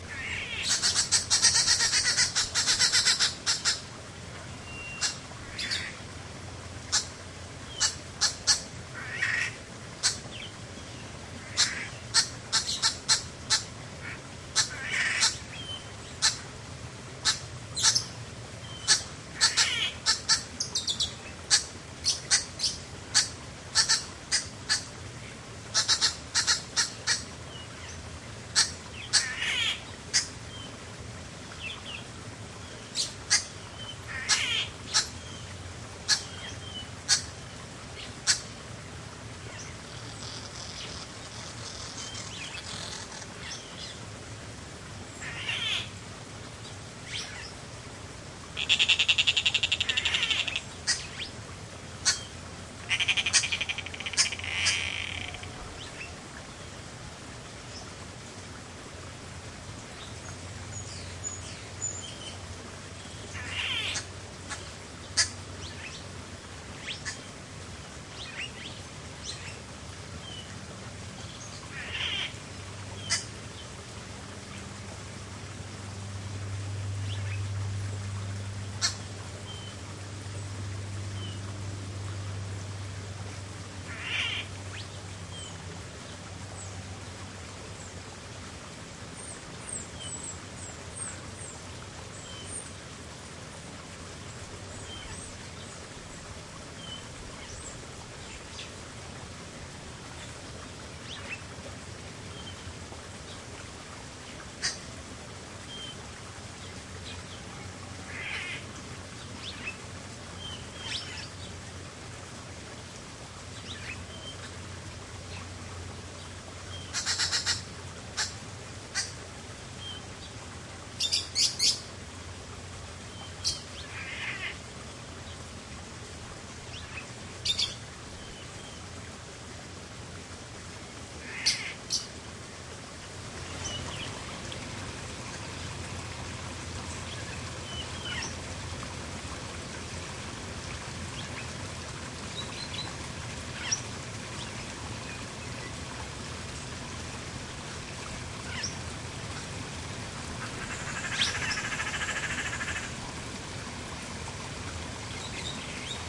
african aviary
Recorded at the Miami Metrozoo. This is at the African Starling Aviary. Birds include Green Wood-hoopoe, Blue-bellied Roller, and various starlings. There is also a small stream in the background.
african, aviary, birds, field-recording, starlings, stream, tropical, water, zoo